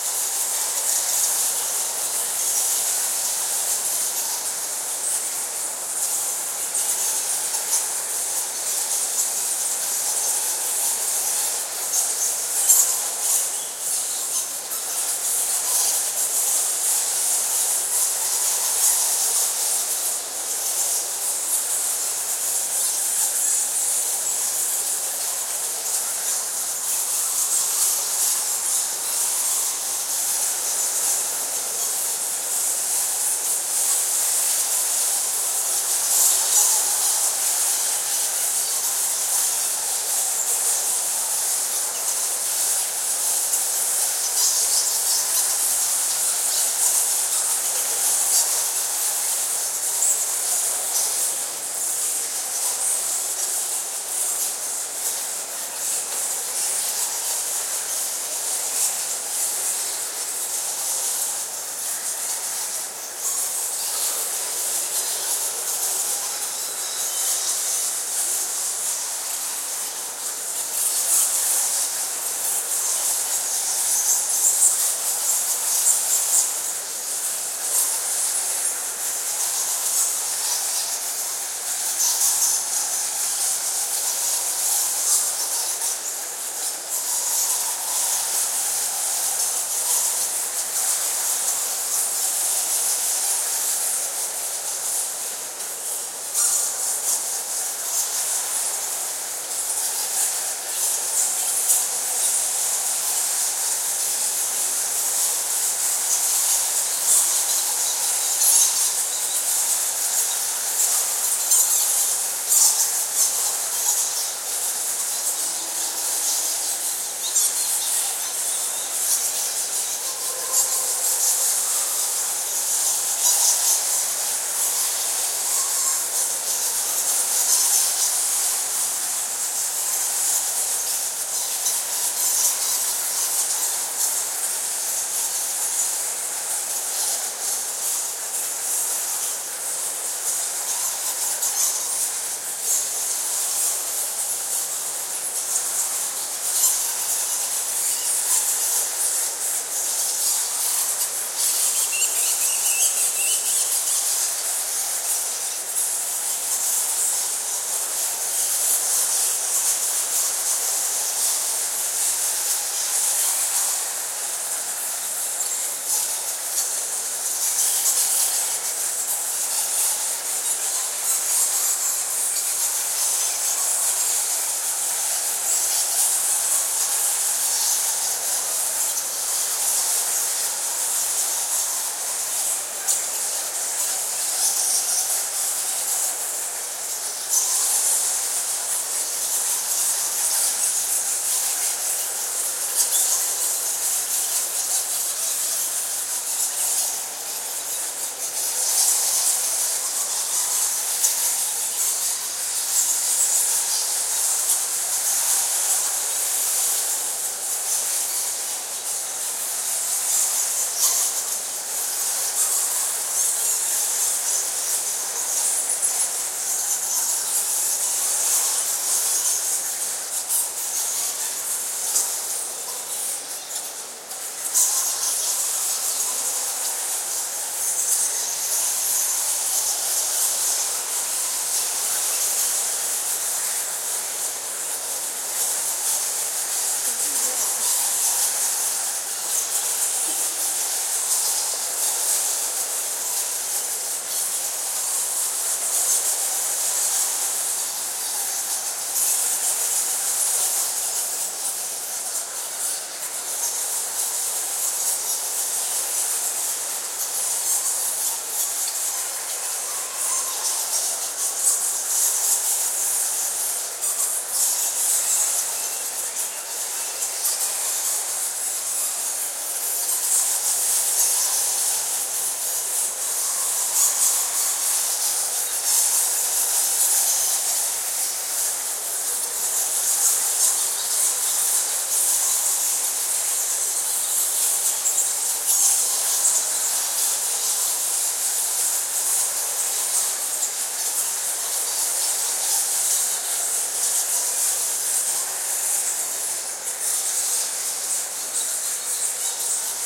Texture of screeching bats, recorded in a cave on Ba Be lake, Vietnam. Clean apart from some human voices at times. Recorded by Mathias Rossignol, december 2014.